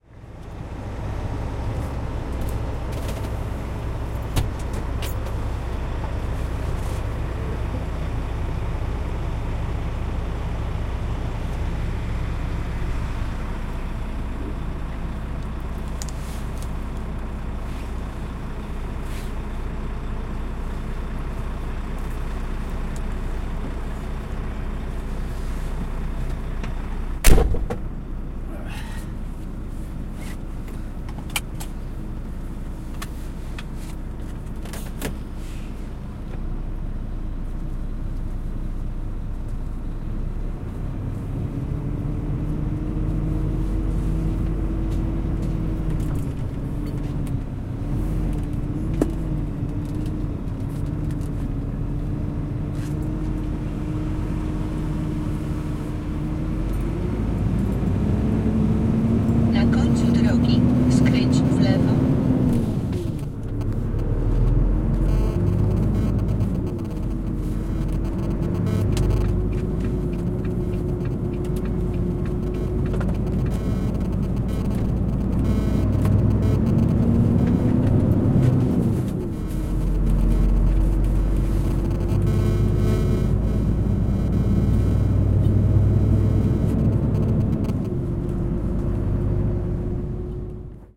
110801-on our way
01.08.11: the second day of my research on truck drivers culture. Departure from the international logistic company base. Sound of the truck engine, voice of the navigator, some disruptions (I left all disruption because of some ethnographic assumption - recorder as an interpreter).
engine, whirr, denmark, noise